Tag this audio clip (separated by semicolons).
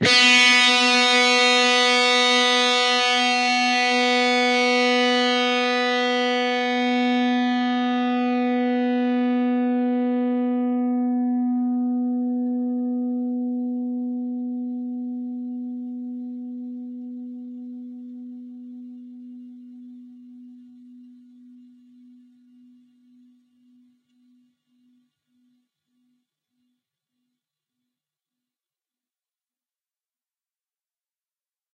distorted; distorted-guitar; distortion; guitar; guitar-notes; single; single-notes; strings